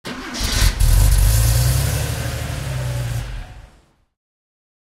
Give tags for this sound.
automobile; car; engine; sports; vehicle

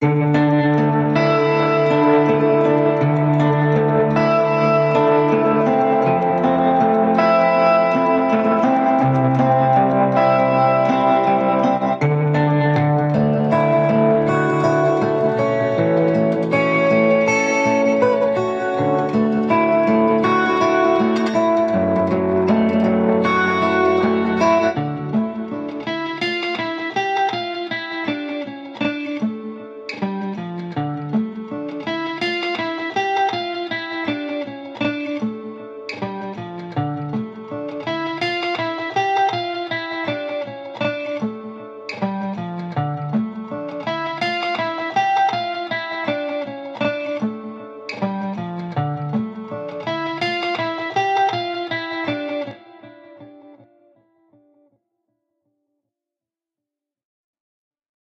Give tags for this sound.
free; music; sad; song; sound

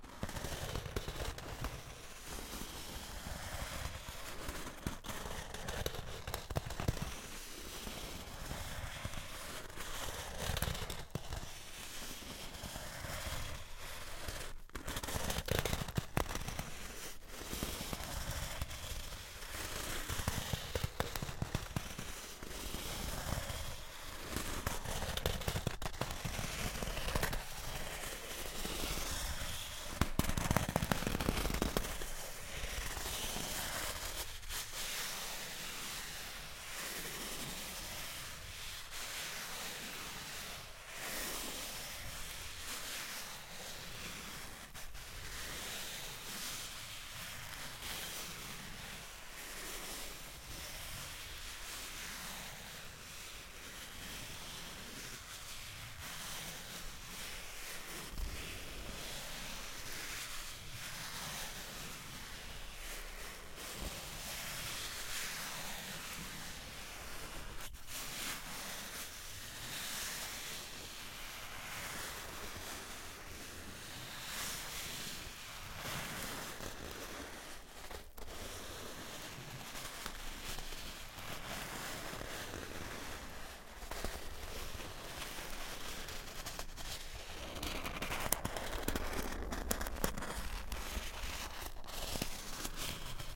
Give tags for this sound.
movement; slow-movement; texture; Styrofoam

